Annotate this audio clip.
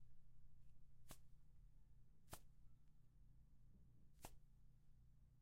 I made this blink with my hands and im very proud of it.